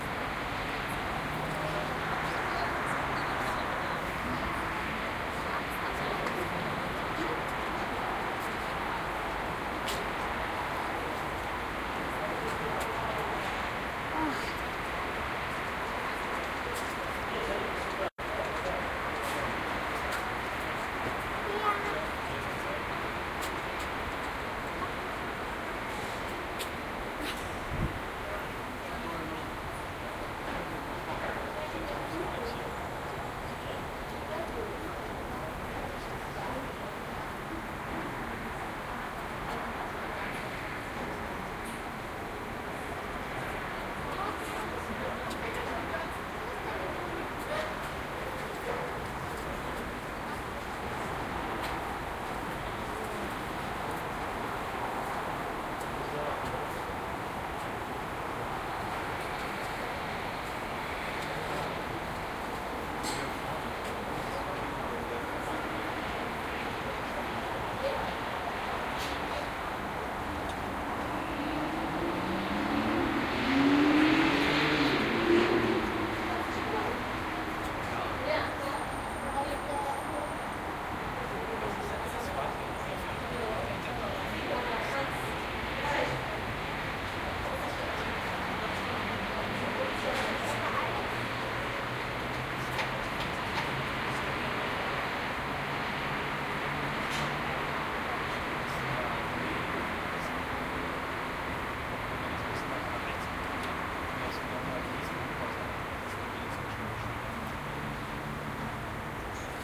Townhall Tower Hannover
The magnificent New Town Hall was built at the beginning of the 20th
century. From the top one has a brilliant view. To record the town from
above would be brilliant on a day without wind. This is only a short
track. I used my Soundman OKMs and Sharp Minidisk MD-DR 470H.